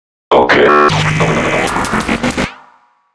Gave myself a wee sound challenge tonight and knocked up some transformer noises.
alien, android, automation, bionic, cyborg, droid, galaxy, machine, mechanical, robot, robotic, spaceship, Transformer